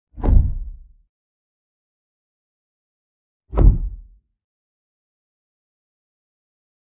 two more thumps. no more thumps tonight.
close, gate, hit, oneshot, shut, slam, thump